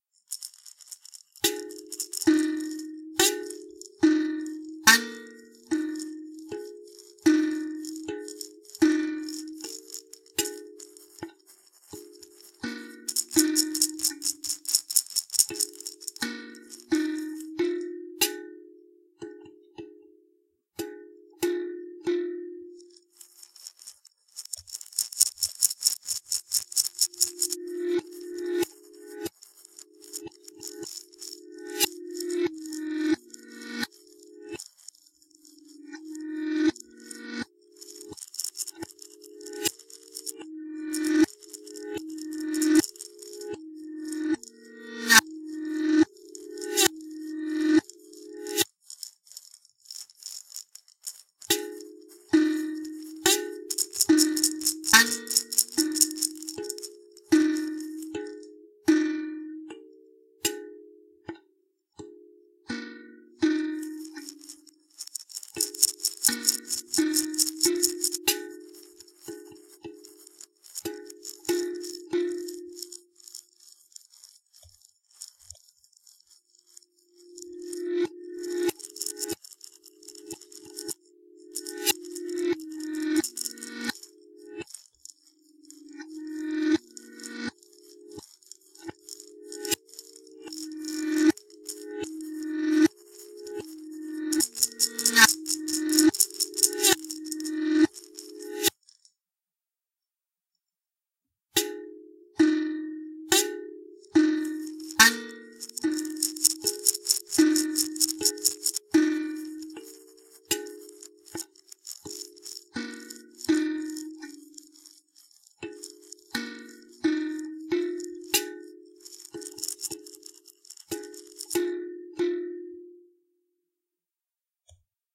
Back and Forth (Plunking and Shaker)
A short mix of forward and reversed plunking on a percussion instrument made of a coconut and steel tabs from Jamaica and some shaker egg behind.